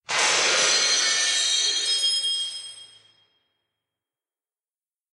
Slow Motion Glass Shatter
Glass shatters in slow motion. The instance I used it in was to highlight a memory.
Created by slowing and picth bending this sound:
break, breaking, broken, cinematic, crash, glass, memory, motion, pane, shatter, slow, smash, window